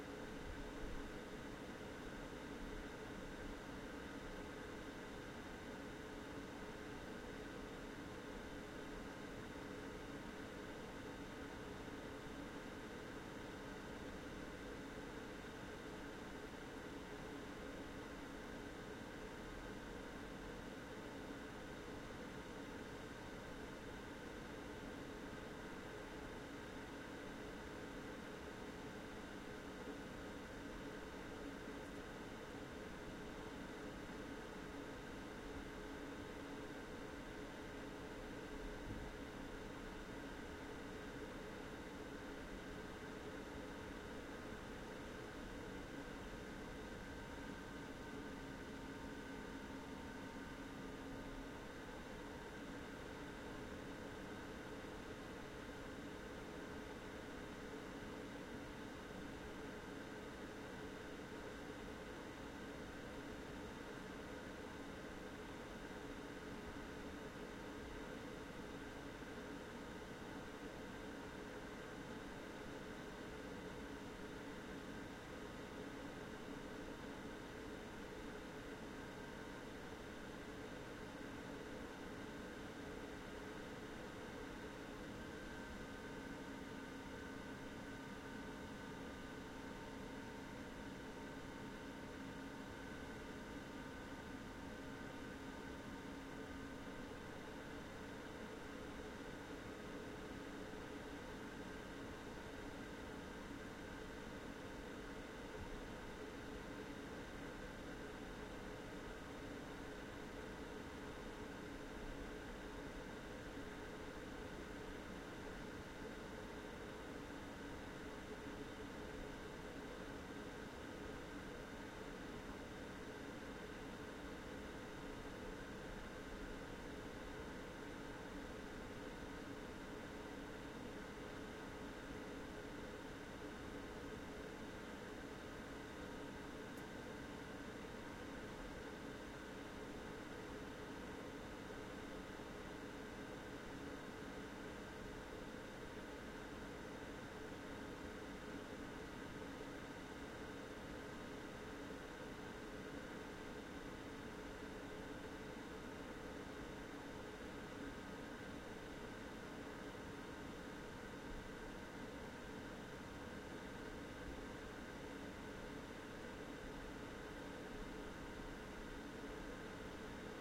Computer Hum
Sound of hum of computer